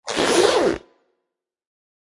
BRUNIER Lucas 2016 2017 zip
I recorded the sound, i cut the sound on fruity loops and used automation enveloppe for make a fade out. After, i put compressor and equalizer on it.
C’est un objet sonore avec une itération variée en un seul son « cannelés ». Son timbre harmonique est grinçant et déchirant. Quant au grain, il est rugueux et métallique. Il a un dynamique, avec une attaque plutôt forte et son profil mélodique est glissant, il fait une sorte de vague. Il monte pour mieux redescendre. Cet objet sonore a été compressé et filtré par un équaliseur.
clothing, jacket, zipper